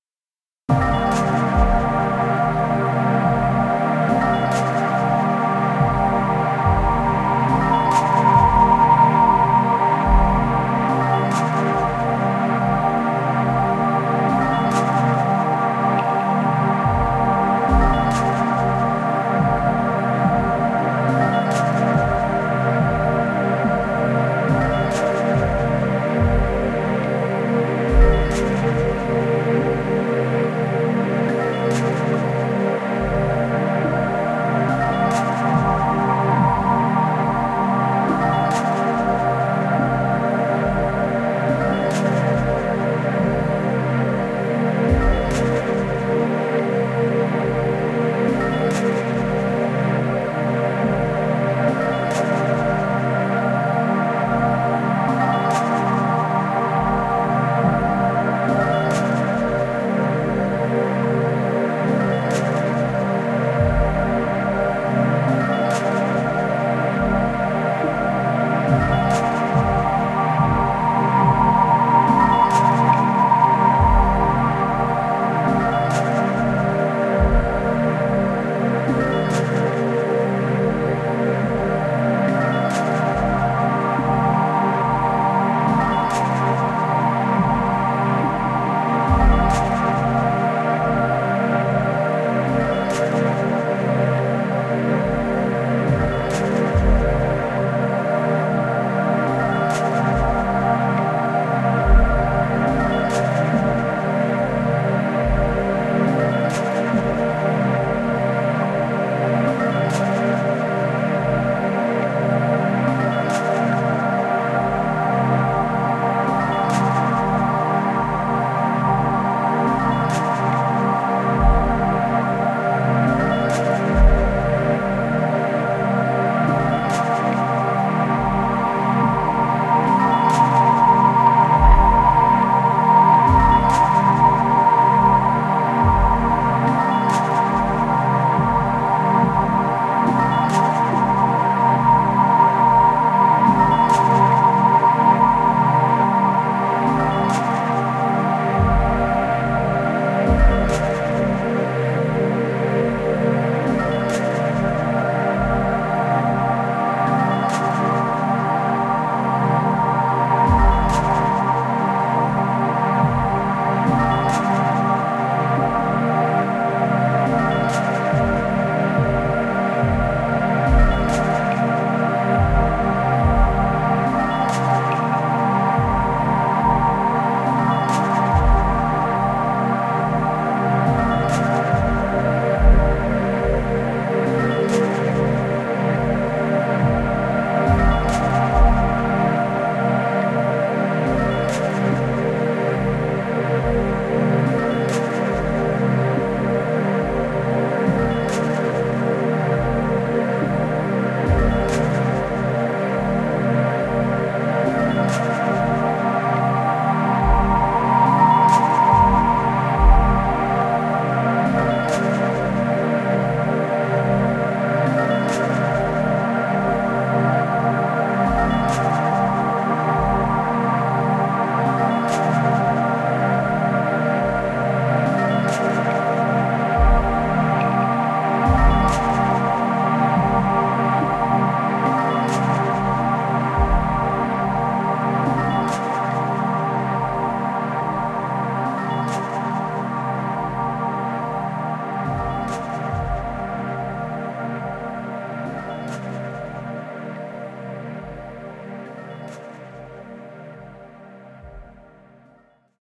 Nvmx Pattern 070116
Created on the Korg Electribe 2 and recorded with a Zoom H-5.
Cut and converted in Ocenaudio.